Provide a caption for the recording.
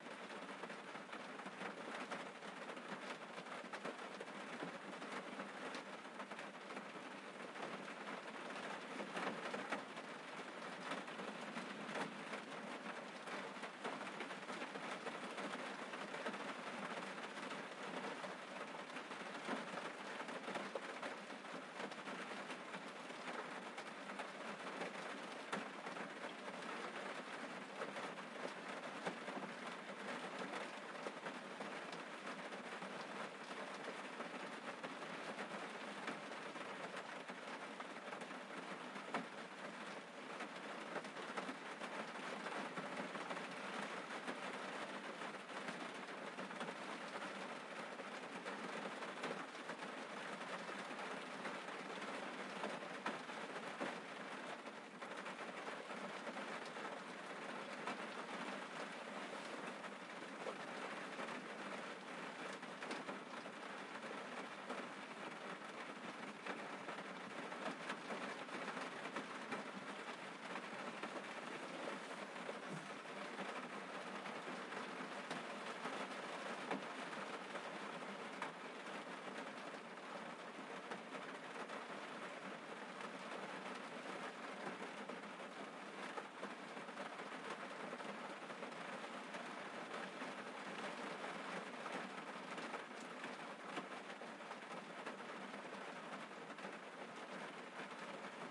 Moderate Rain (as heard from inside a car)
A binaural recording of rain I captured while sitting in a parked car. Some minimal engine noise from passing vehicles. Recorded with a Zoom H4n.